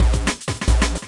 A simple beat usefull for anything you want thats not frenetic, its just a misc beat:)
Beat, Misc, Idrum